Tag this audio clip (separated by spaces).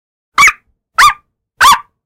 puppy dog yap happy bark small-dog